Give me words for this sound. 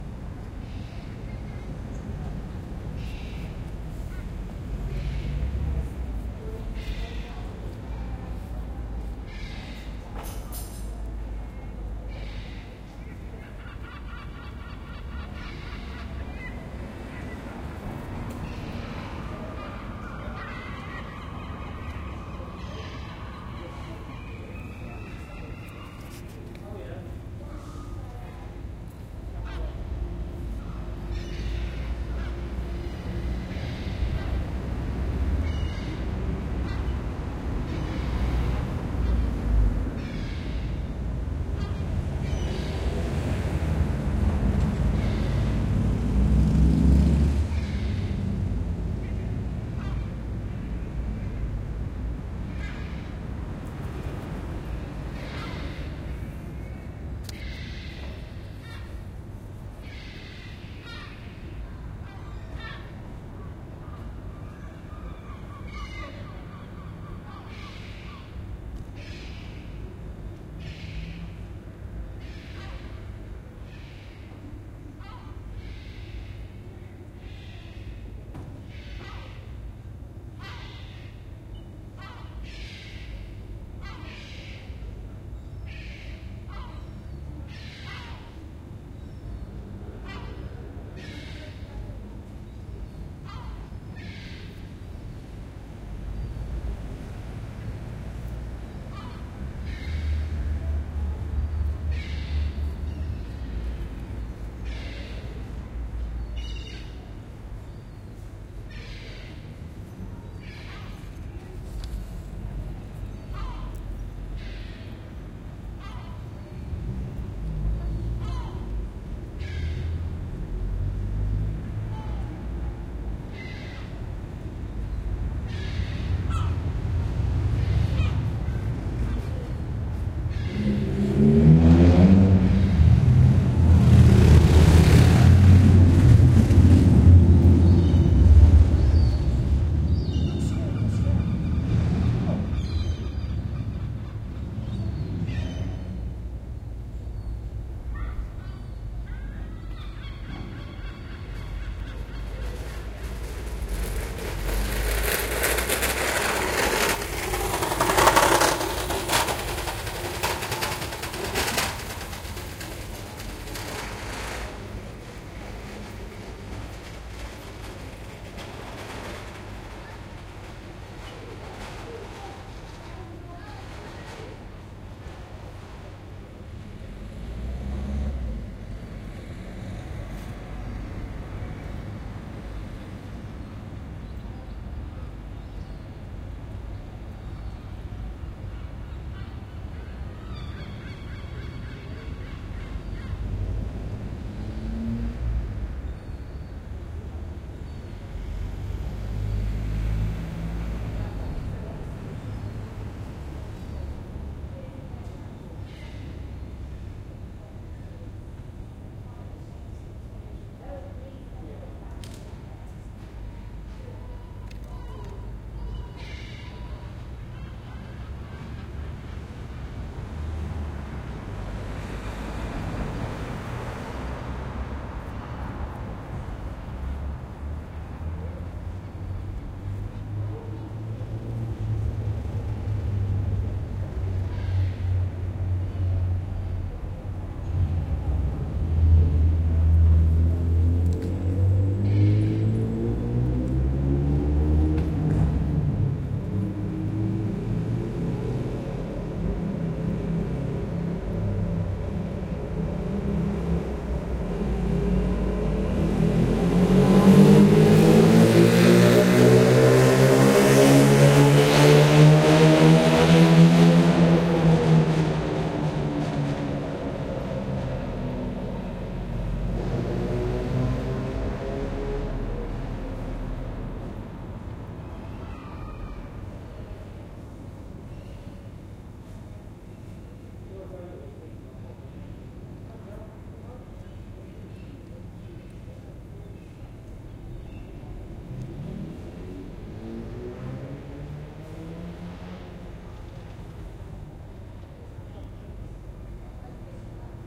Seagulls, birds and cars passing ambience out side St Georges Theatre Great Yarmouth UK